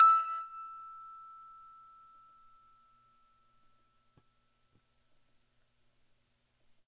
MUSIC BOX E 2
9th In chromatic order.
chimes, music-box